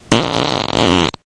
drawn out fart
fart poot gas flatulence flatulation explosion noise
explosion, fart, flatulation, gas, poot